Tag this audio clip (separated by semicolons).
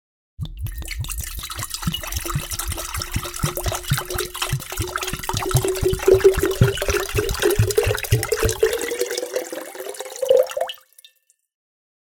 fill; jug; glug; slosh; water; volume; liquid; big; pouring; pour